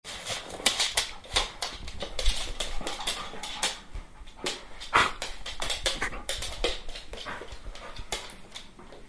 Dog walks slowly inside a room
dog, pets, walking, walk, czech, panska, pet